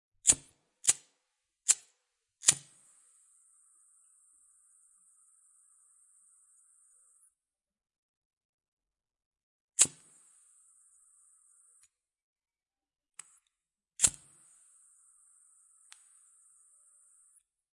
Lighting flame sounds
smoke, flame, light, cigarette, lighter, fire, lighting